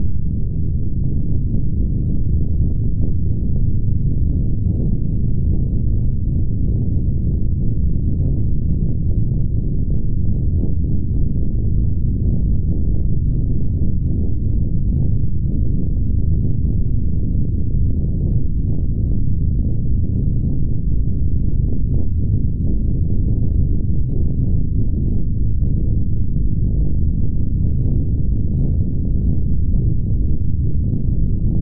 In this case, the parameters were kept stable, at low frequencies with moderate range (spread) of modulation. I took the output into Cool Edit Pro merely to make it a seamless loop. Otherwise it is unmodified, directly from the Analog Box circuit that generated it.
There are some screen shots that might be of interest (and the actual circuit) at this page in the abox2 google group forum, but I honestly don't know if you need to join the group before you can see that forum discussion.